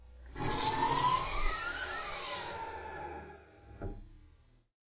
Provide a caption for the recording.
Opel Astra AH Gas Strut Open
Close mic the gas strut as the car bonnet opens.This is a stereo recording using a Rode NT-4 connected to the mic in of an Edirol R-09 made inside a 6m x 6m garage.
car, bonnet, gas-strut, opel-astra